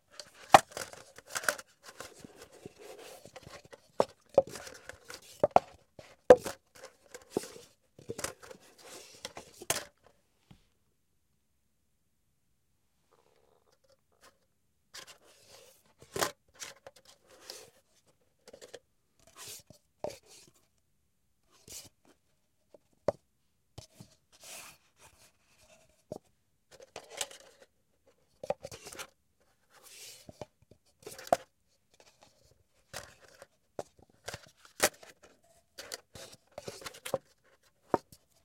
This is a recording of disposable paper coffee cups and lids. Pushing, dropping, and moving them around the table.